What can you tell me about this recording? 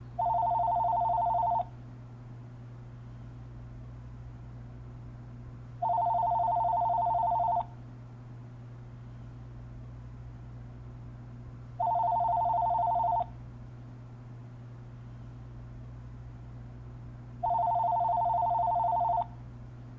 Ringing Phone
House phone ringing in an open kitchen.